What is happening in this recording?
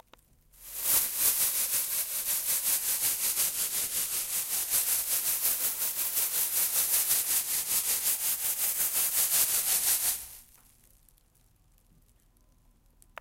Sounds from objects that are beloved to the participant pupils at the Regenboog school in Sint-Jans-Molenbeek, Brussels, Belgium. The source of the sounds has to be guessed